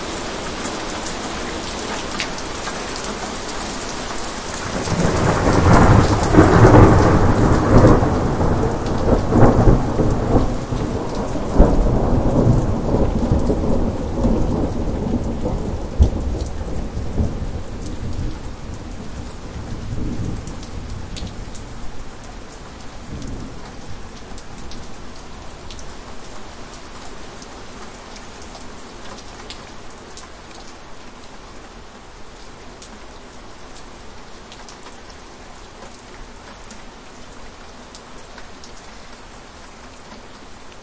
Thunder Rush. 28 July 2021
Heavy rain followed by a blast of thunder that 'rushes' away and fades. I've left enough room for an audio edit to fit your project. Enjoy. On playback, there seems to be a very high pitched 'tick' in places. This is NOT part of the original recording and is NOT included when 'Downloaded'. I think this must be a slight fault on the web site.
thunder, Rain, Rolling